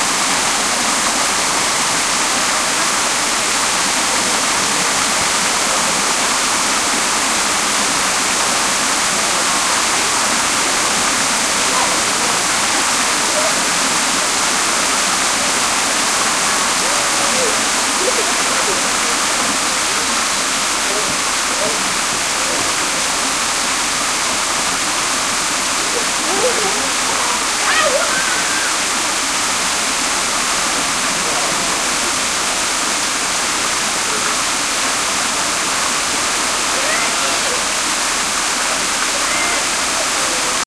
zoo waterfall
Walking through the Miami Metro Zoo with Olympus DS-40 and Sony ECMDS70P. A waterfall!
animals, field-recording, water, zoo